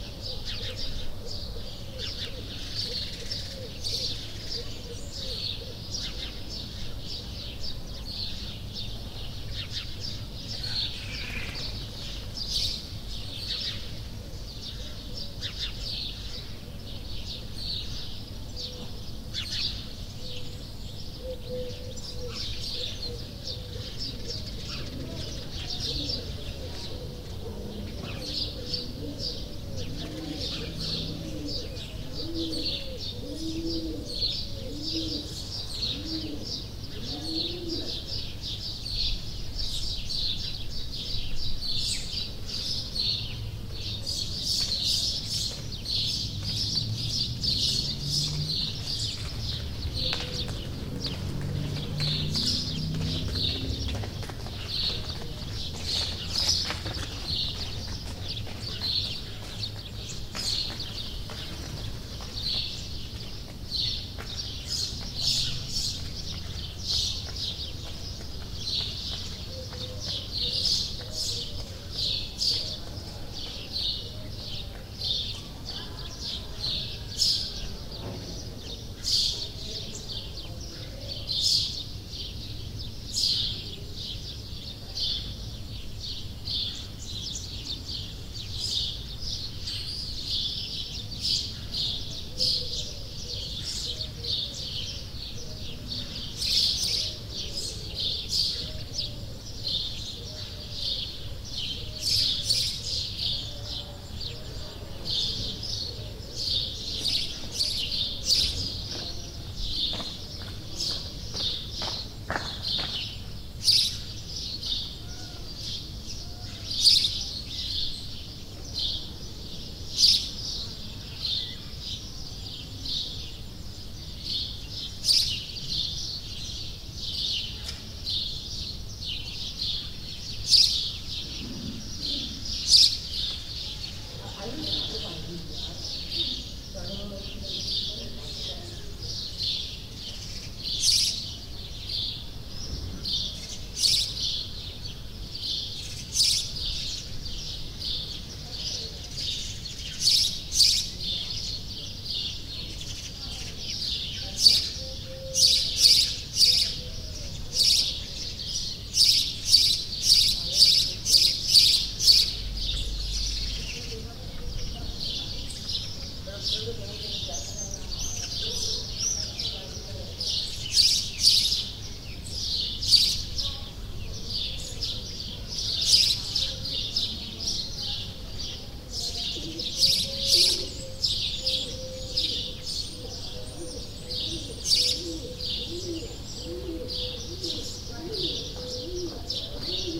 bird ambiance
field recording with lots of birds @ a village in Spain.
First recording in a group of seven.
ambient, village